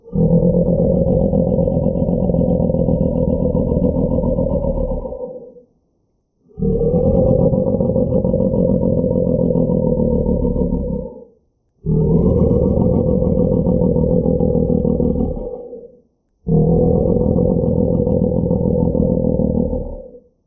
Underwater Dragon-like Monster Growl
This is my homemade leviathan sound.
:D